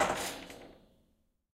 SFX-metal-006
Metal object recorded in a cellar.